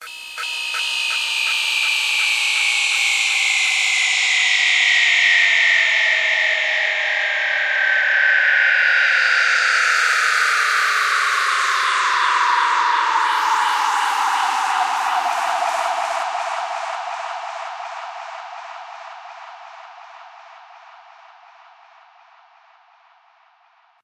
A synthesiser fx from one of my own software synthesiser.